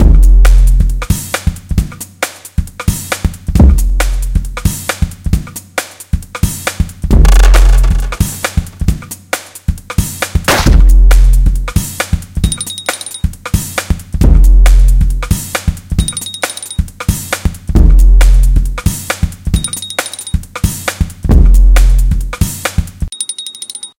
sped up break using sounds from this website